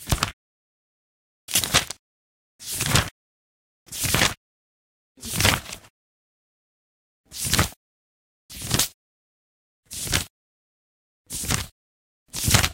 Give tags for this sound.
book page

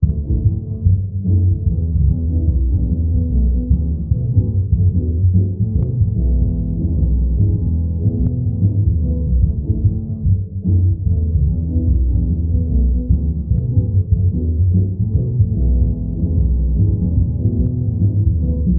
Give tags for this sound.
game music jazzy videogamemusic Jazz

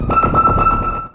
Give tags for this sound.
multisample one-shot synth

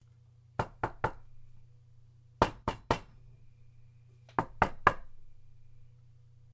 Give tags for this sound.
knocks plank knocking wood knock

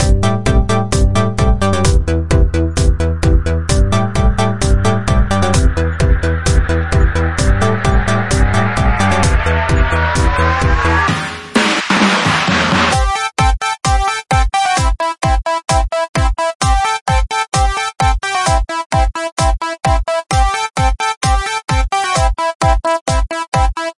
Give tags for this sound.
hiphop sound music fx fade ed tinted

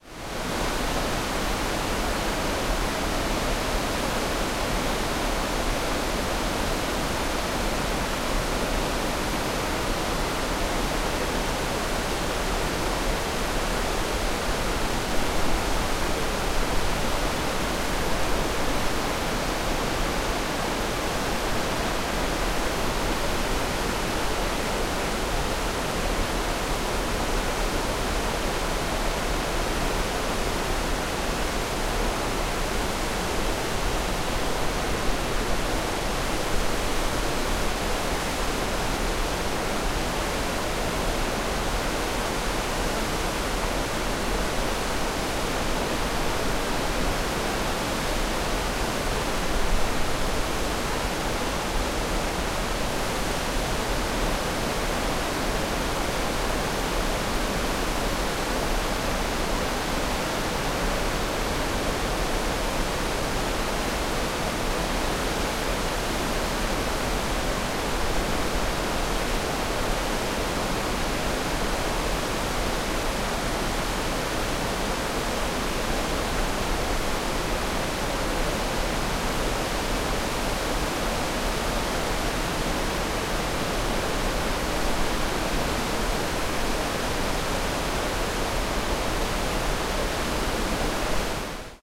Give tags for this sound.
iceland waterfall ingvellir

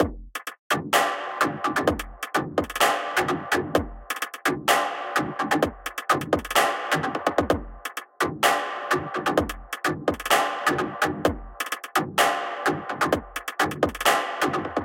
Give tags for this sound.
Drum-Loop Reverb Hi-Hat Rim-Shot Rhythm Drum Wide Bitcrushed Trap Hip-Hop Bass Hat Hi-Hats Full-Drum-Loop Shot Distortion Full Clap Distorted Drums Snare Hi Bitcrush Drum-Kit Rim Kick Room-Drum-Kit Spread Stereo Loop

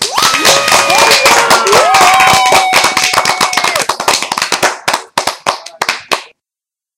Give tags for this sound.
clapping-yelling yell yelling

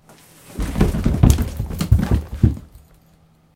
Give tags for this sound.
fall foley painful recording shoes stairs

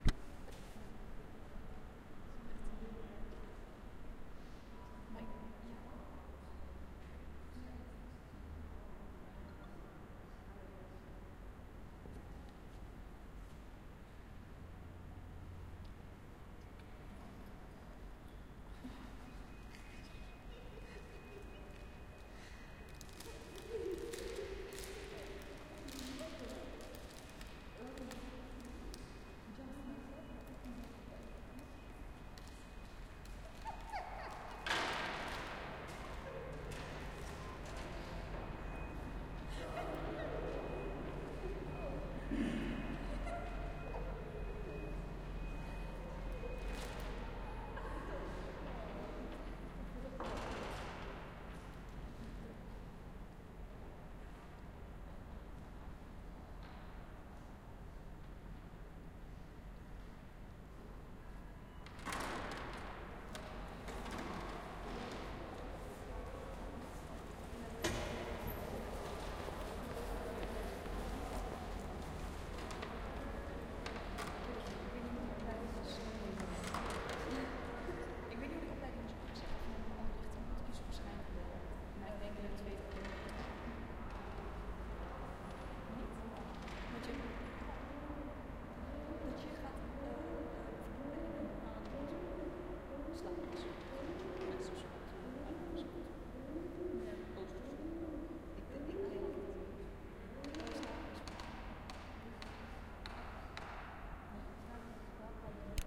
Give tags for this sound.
squeeking general-noise background atmosphere ambiance squeek pigeon door soundscape doors people hall cathedral ambience echo field-recording background-sound talking creepy laughing Fieldrecording ambient

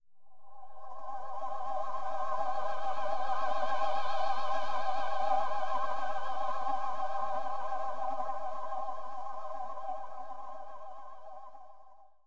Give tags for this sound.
170 bass BPM C dnb drum key loop synth

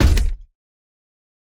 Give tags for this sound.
droid footsteps mech robot scifi

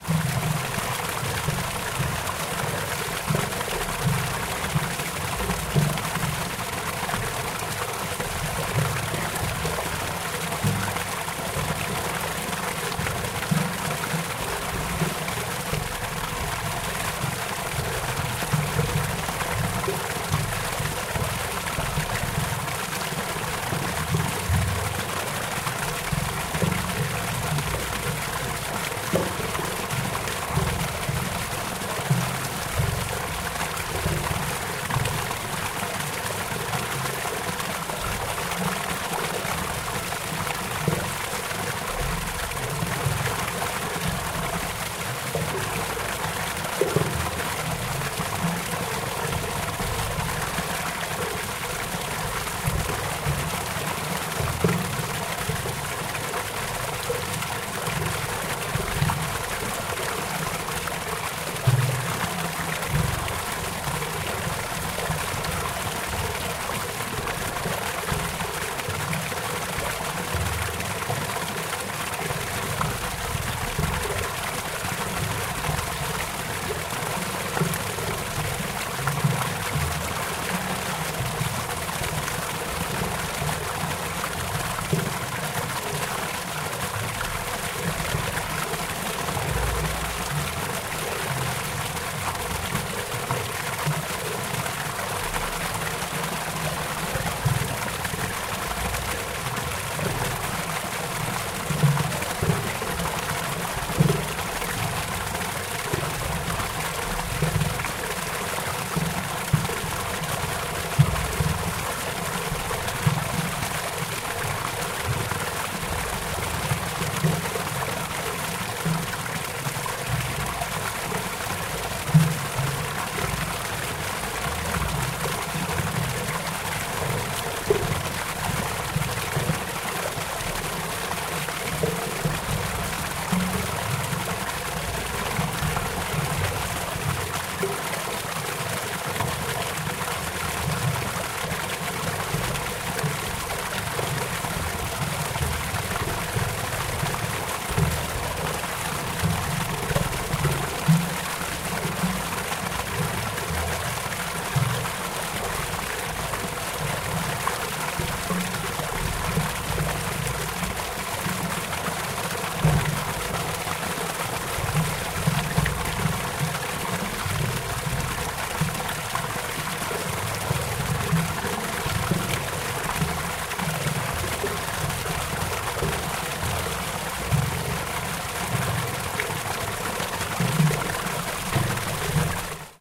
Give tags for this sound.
cling stream waterpump

